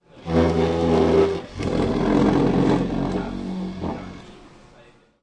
Dragging a chair with two hands
This sound was recorded at the Campus of Poblenou of the Pompeu Fabra University, in the area of Tallers in the Classroom number 54.030 at the bottom of the room. It was recorded between 14:00-14:20 with a Zoom H2 recorder. The sound consist in a noisy tonal high amplitude signal due to the drag of a chair with the room floor.